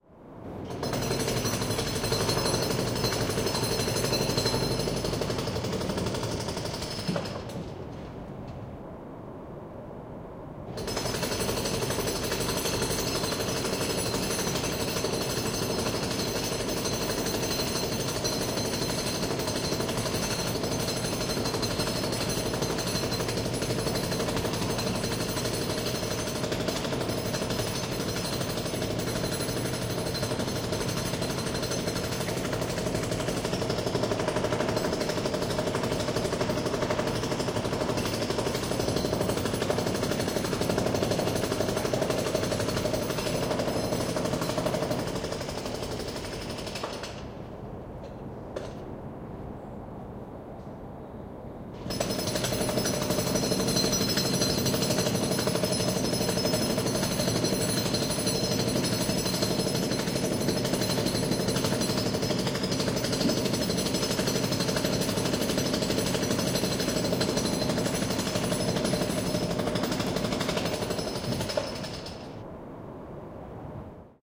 Concrete Hammer Hex Breaker power tools, construction edlarez vsnr